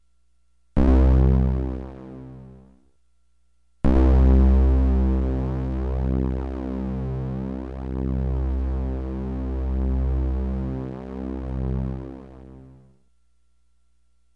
2 Brass Tones,Blip + Sustained
analog, keyboard, multisampled, synthesised